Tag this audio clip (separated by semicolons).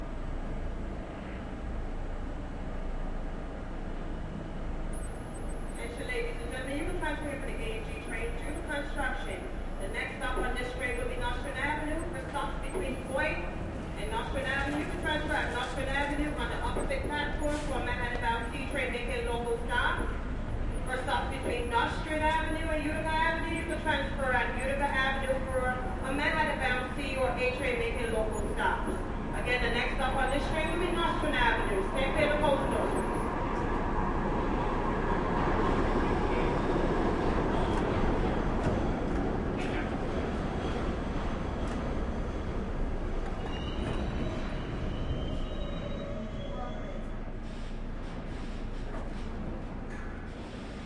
H4n; MTA; NYC; Zoom; field-recording; subway